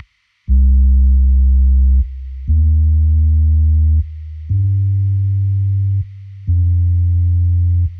Korg A53 Deep Bass
Deep dub bass with lots of air. Recorded from a MicroKorg. There is a minor blip at the start which could be cleaned up with a fade-in.
bass, deep, dub, electronica, synth